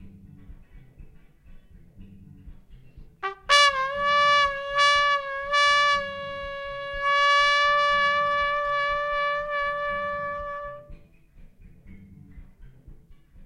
This sample was made by friend of mine, trumpet player Andrej, in one of our session.
blues, environmental-sounds-research, funky, improvised, jazz, nature, trumpet